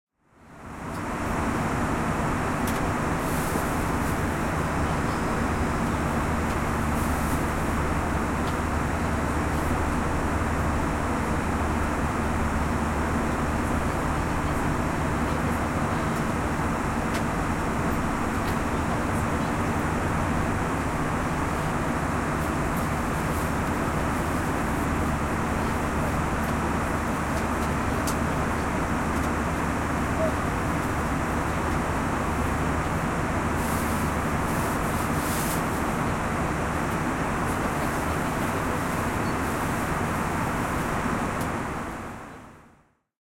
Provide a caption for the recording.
recording during a flight with an airbus a320
ambience, boeing, interior, plane, recording